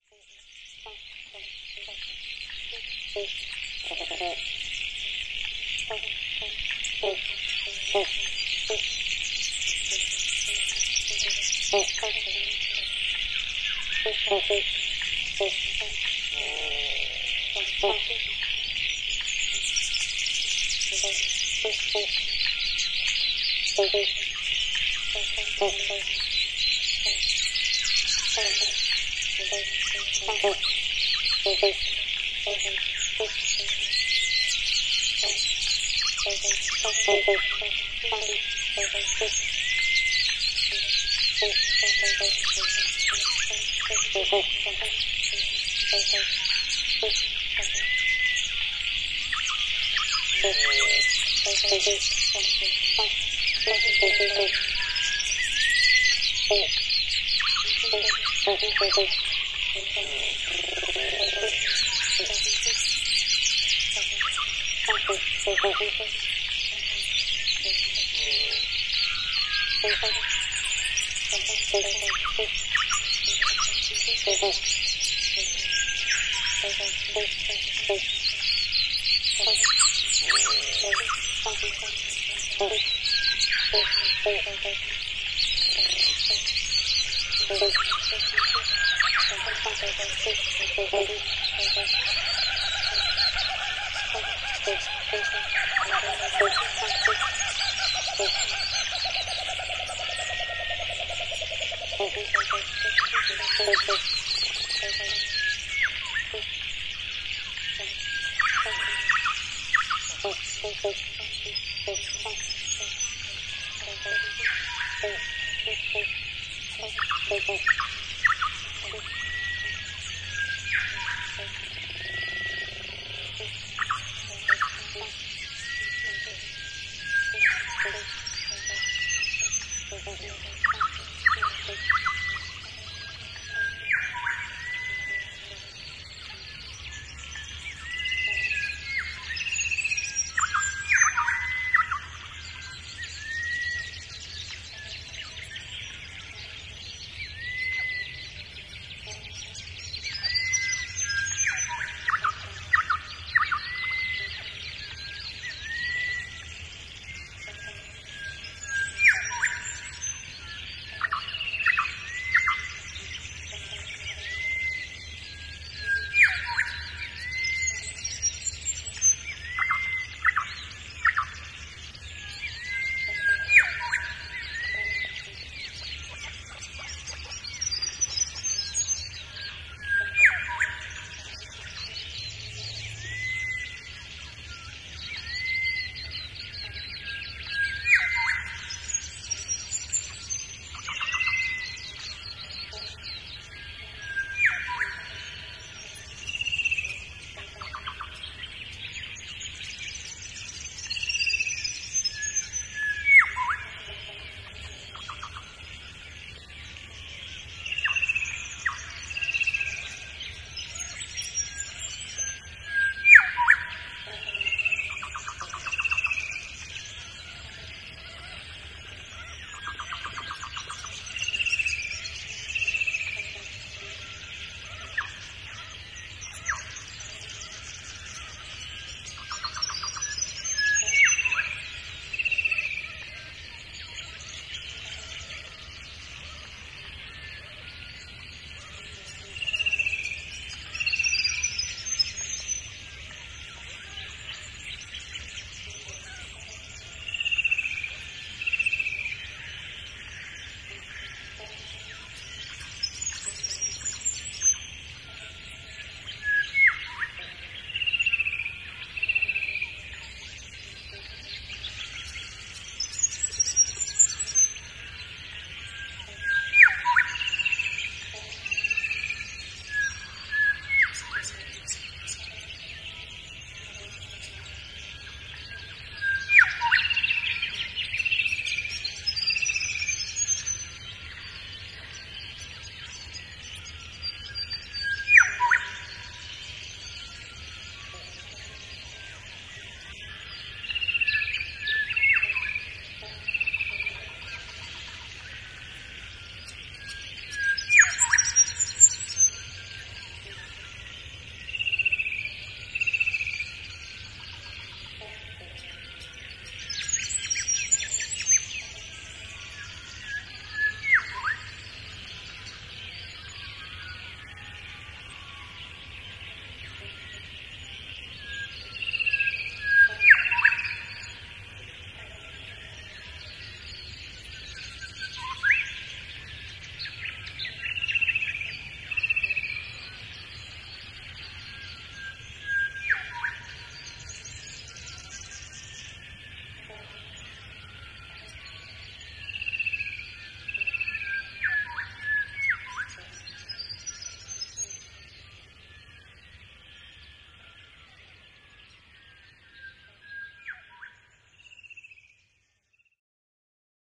Stereo Mic Experiment #1
This is a little experiment. I had two stereo mics recording into two separate recorders. A rode NT4 into a Zoom 4 and an MS set up using a Sennheiser 416 paired with a MKH-30 staight into the Zoom H4n. The Rode NT4 was positioned by the lake, surrounded by wonderful frog sounds, the MS was 80 metres away from the lake. I rolled on both recorders and made a sync point with a loud clap near the Rode mic. Using Reaper, i synced the two tracks, then did a very long cross fade from the lakeside mic to the distant mic. The idea being that i would create the sound illusion of moving from the lake to 80 metres away from the lake.
atmos, Australia, birds, dawn-chorus, field-recording, frogs, kookaburra